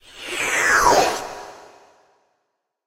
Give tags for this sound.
air
whoosh

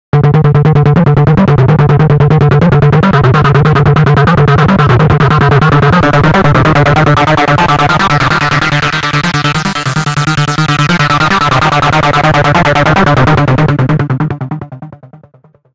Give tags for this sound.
303
acid
distorted
goa
lead
psy
psychedelic
synth1
trance
uplifting

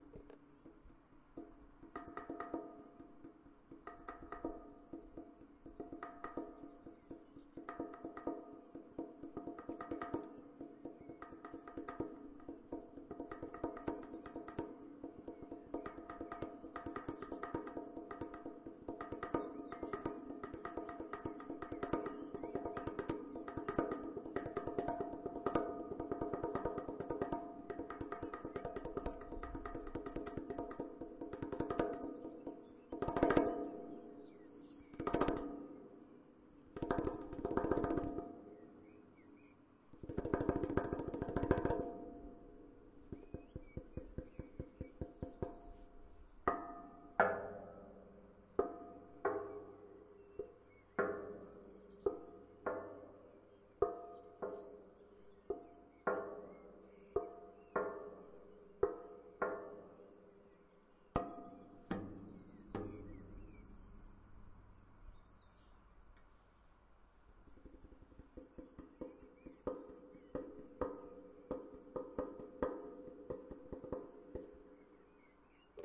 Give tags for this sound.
metal
snare

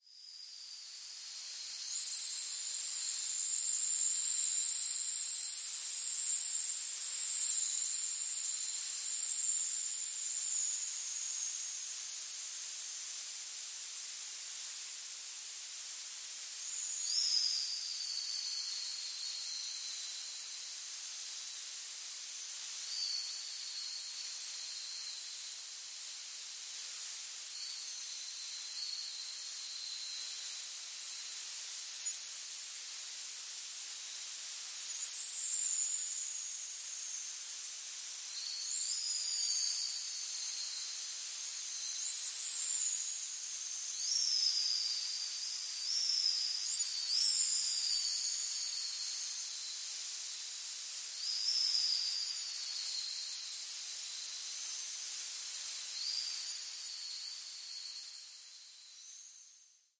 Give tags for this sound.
ambient
drone
reaktor
soundscape
space

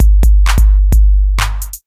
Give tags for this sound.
club
drums
free
phat
vintage

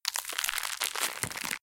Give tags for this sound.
crackle
crackles
crackling
noise
noises
pop
popping
pops

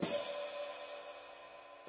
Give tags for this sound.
Bosphorus
bubinga
cymbal
drum
drums
drumset
Istambul
metronome
percussion
ride

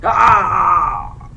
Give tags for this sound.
666moviescream
creature
goof
monster

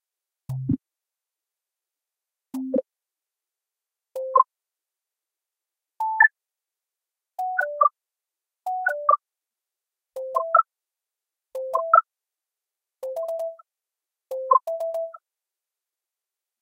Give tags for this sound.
alarm
alert
alien
atmosphere
bridge
call
digital
electronic
emergency
energy
engine
fiction
fire
future
futuristic
fx
hover
noise
peep
science
sci-fi
signal
sound-design
space
spaceship
starship
warning
weird

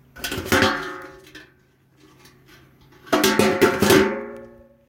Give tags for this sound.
field-recording
garbage-can
lid

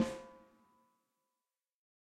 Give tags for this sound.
14x6
accent
audix
beyer
breckner
combo
drum
drums
dynamic
electrovoice
josephson
kent
layer
layers
ludwig
mic
microphone
microphones
mics
multi
reverb
sample
samples
snare
stereo
technica
velocity

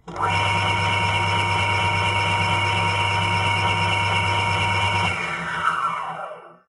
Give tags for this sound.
bench-drill
mechanical
tools

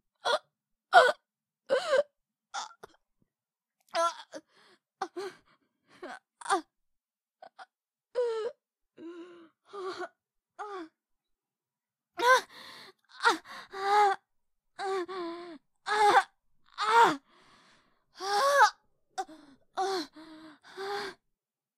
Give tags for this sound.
death
die
dying
female
gasp
horror
hurt
moan
pain
painful
scream

